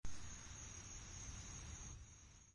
Passive jungle at night.